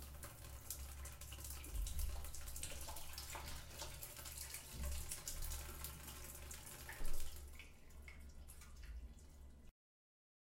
Water draining out of a sink.